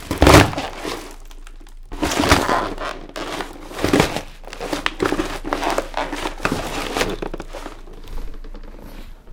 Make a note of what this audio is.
Card box step on CsG
paper, rustle, crumple